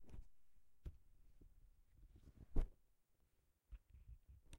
laptop open and close
TThis is Zoom h1n recording of laptop cover opening and closing.
close, cover, Laptop, open